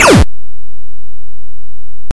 Louder pewing sound
Created using BFXR.
robot, decimated, arcade, 8-bit, machine, chip, game, computer, video-game, chippy, lo-fi, noise, retro